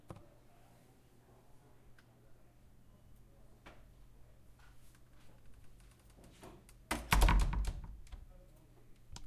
College door close
a not so aggressive closing of E203's door
close, closing, door, shut, wooden